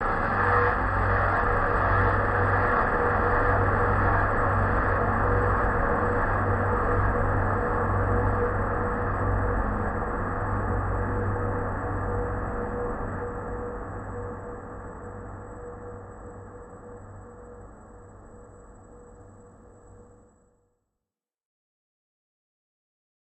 Spooky Sounds from Pitching my vocals but the problem is some high resonance seems to show which is kind of then itch sound to heard